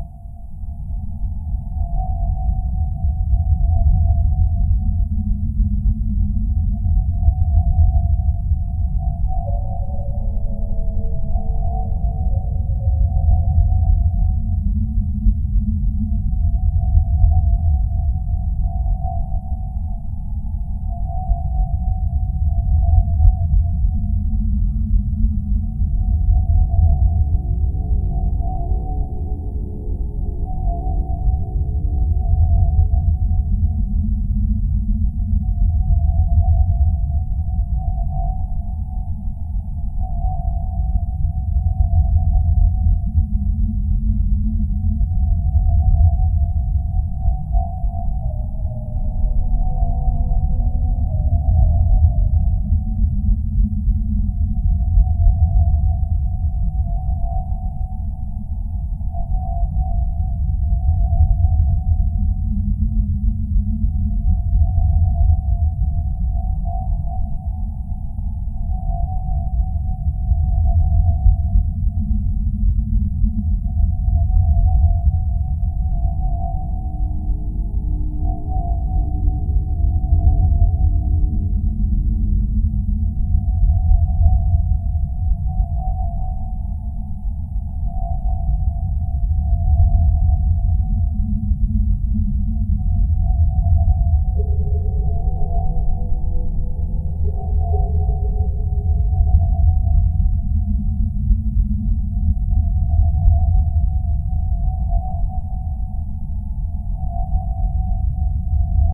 Cavern Ambience Droning
A short loop of a cavern ambiance droning made for a game I'm designing the audio for.